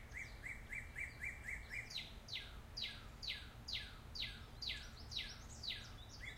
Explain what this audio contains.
A cardinal singing. Recorded along the American Tobacco Trail in Durham, NC, on a minidisc recorder and Sound Professional stereo mikes.